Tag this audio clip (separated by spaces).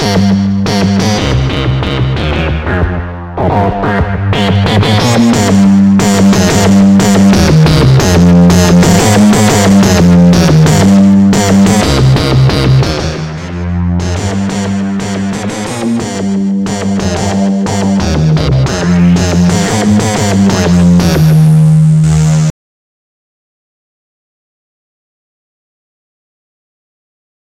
ambient backdrop background bass bleep blip dirty electro glitch idm melody nord resonant rythm soundscape tonal